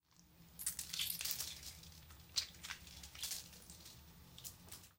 27) Visceral sounds
foley for my final assignment, me beating and stabbing a tomato, someone might see it as explicit so i marked it as such.